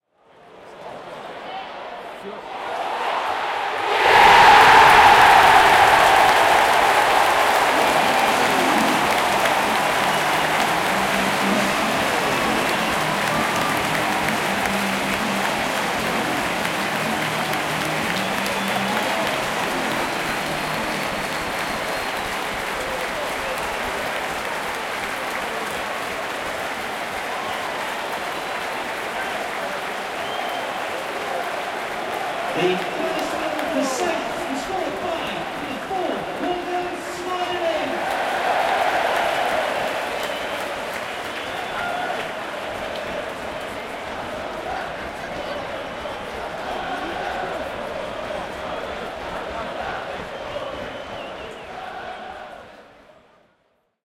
Football Crowd - Goal- Cheer - Southampton Vs Hull at Saint Mary's Stadium
Recorded at Southampton FC Saint Mary's stadium. Southampton VS Hull. Mixture of oohs and cheers.
Boo, Cheer, Football, Football-Crowd, Large-Crowd, Southampton-FC, Stadium